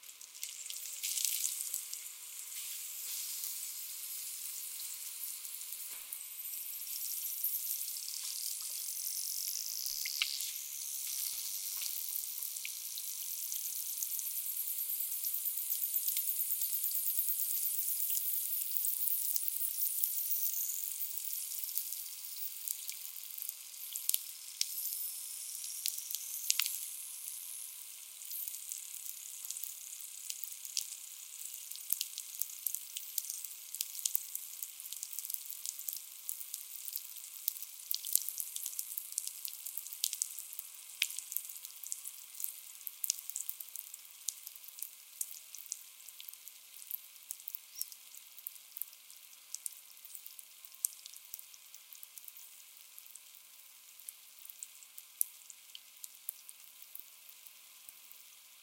wet water poured over dry dirt